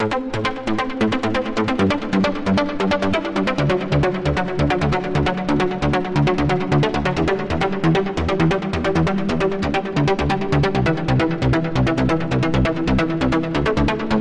trance lead
Software synthesizer sequence produced with Jeskola Buzz.
134-bmp, electronic, loop